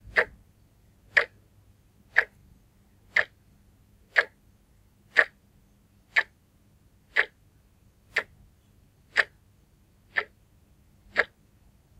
Wall Clock Ticking
Wall clock tick tack sound recorded, looping perfectly.
Recorded with a Zoom H2. Edited with Audacity.
Plaintext:
HTML:
clock, time, tack, wall-clock, clockwork, tac, chronos, looping, plastic, tick, battery-powered, cheap, ticking, loop, tick-tock, tic, battery, ticks